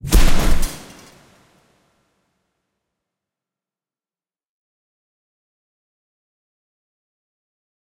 Sci-Fi Explosion 1
Just a small Sci-Fi explosion Sound Design project i was working on lately.
i Would love to hear your thoughts on it.
Explosion, fi, intense, Sci, Sci-Fi, impact, alien